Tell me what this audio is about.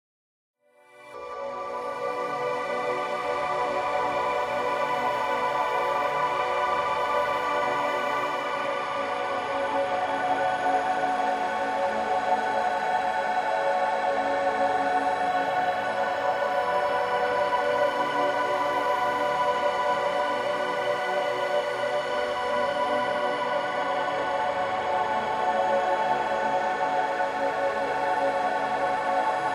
Other Side of the Universe
A luscious atmosphere made by adding various wet delay and reverb effects to a pad sequenced with a chord
130, ambience, atmosphere, bpm, dreamy, effects, evolving, expansive, house, liquid, long, lushes, melodic, morphing, pad, progressive, reverb, soundscape, wide